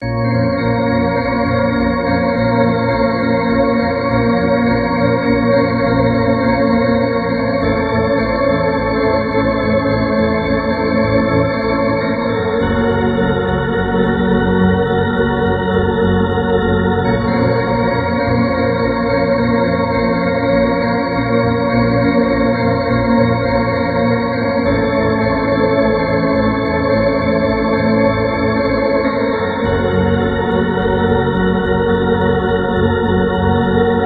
circus music loop by kris klavenes
did this on keyboard hope u like it :D
circus, creepy, dark, empty-circus, fear, film, horror, movie, old, sad, scary, spooky, terror